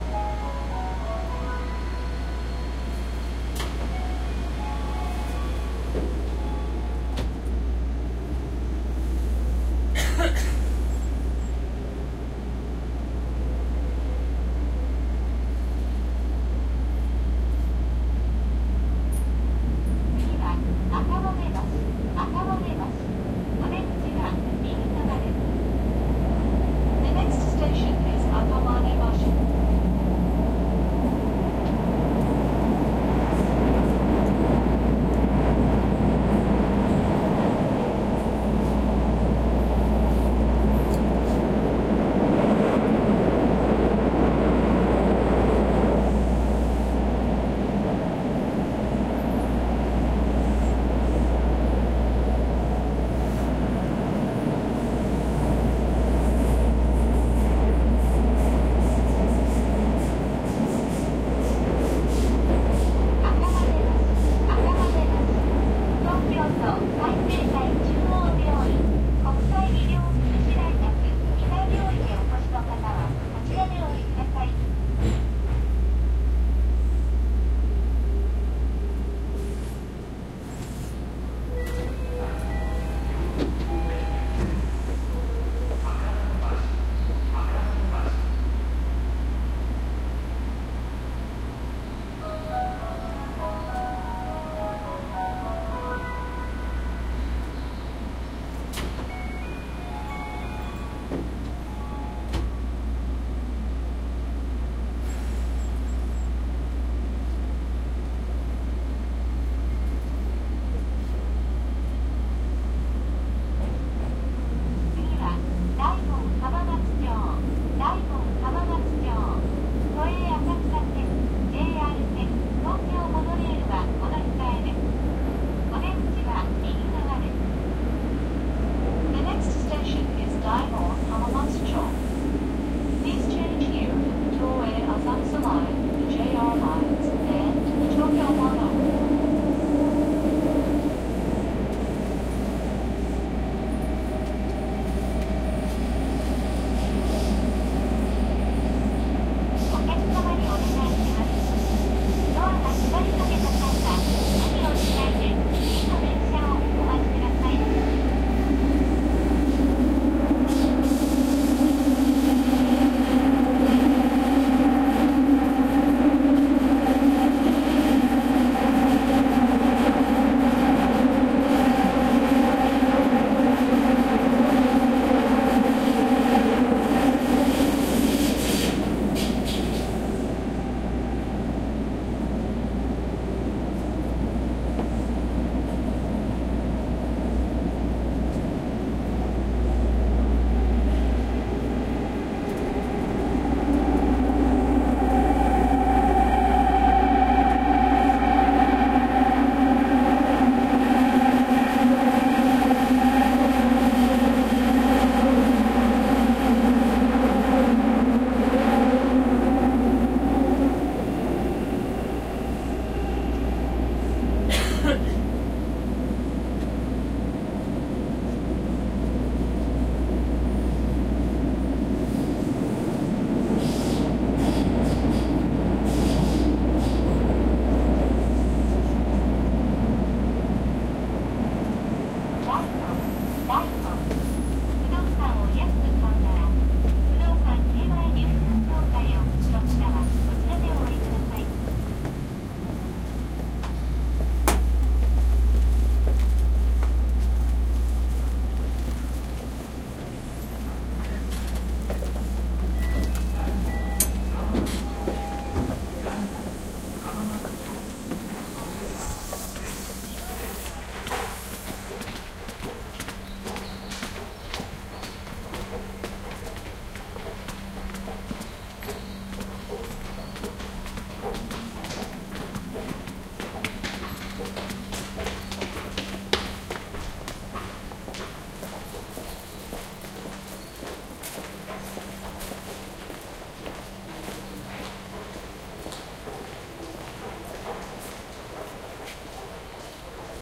Japan Tokyo Hamamatsuchou Train Trip 1
One of the many field-recordings I made in train stations, on the platforms, and in moving trains, around Tokyo and Chiba prefectures.
October 2016. Most were made during evening or night time. Please browse this pack to listen to more recordings.
announcement, announcements, arrival, beeps, depart, departing, departure, field-recording, footsteps, Japan, metro, platform, public-transport, rail, railway, railway-station, station, subway, Tokyo, train, train-ride, train-station, train-tracks, tram, transport, tube, underground